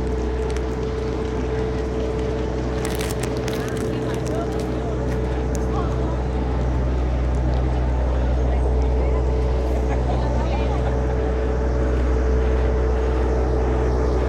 Cali. Paisaje Sonoro Objeto 1 Planta Electrica Alimentadora (1)
Registro de paisaje sonoro para el proyecto SIAS UAN en la ciudad de Palmira.
Registro realizado como Toma No 01 Calle 10 Carreras 4a a 6ta.
Registro realizado por Juan Carlos Floyd Llanos con un Iphone 6 entre las 3:30 pm y 4:00p.m el dia 04 de noviembre de 2.019
Cali, Paisaje, Planta-electrica-alimentadora-objeto1, SIAS-Proyect, Sonoro, Soundscape, Sounds-Of-Cali